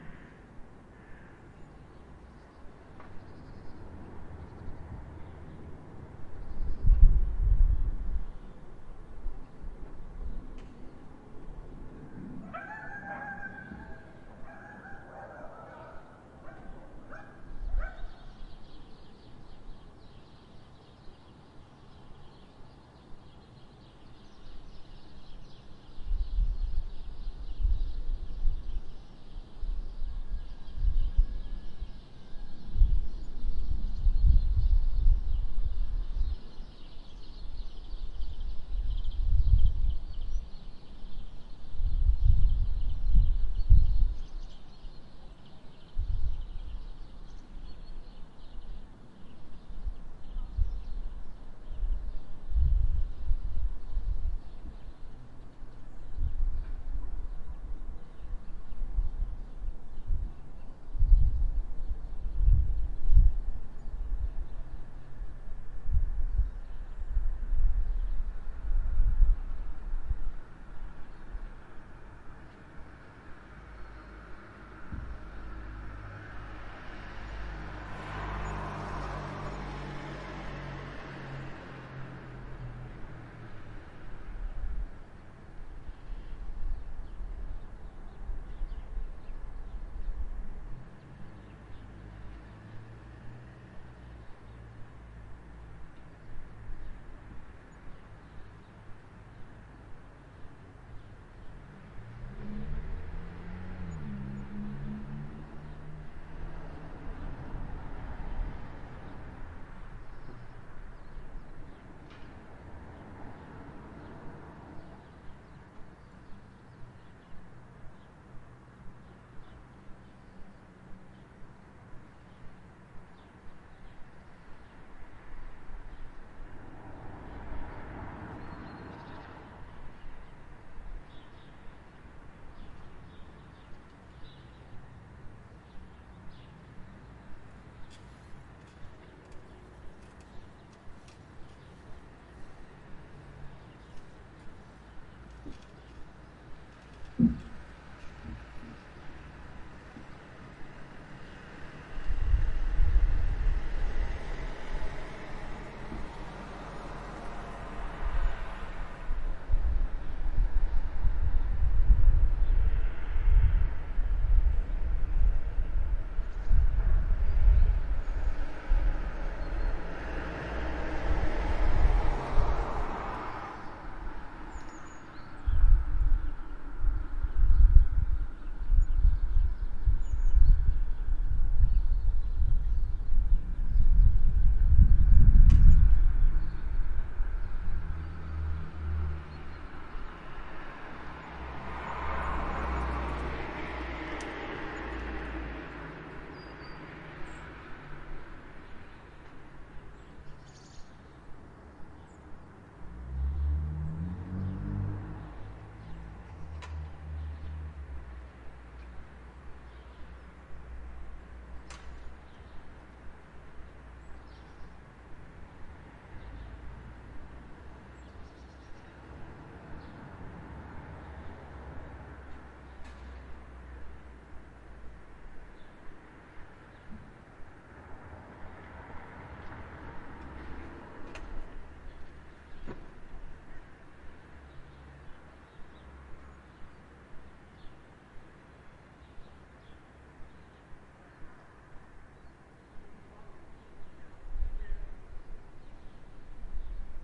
Streets atmosphere in the suburbs, birdsong, dogs barking, cars
Straßenatmosphäre, Vogelgezwitscher, Hundebellen, Autos
Atmosphère des rues dans la banlieue, le chant des oiseaux, les aboiements des chiens, des voitures
Ambiente de la calle en los suburbios, pájaros, perros ladrando, automóviles
Atmosfera strada in periferia, il canto degli uccelli, cani che abbaiano, automobili